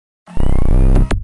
Gear shift 1
Alien, game, space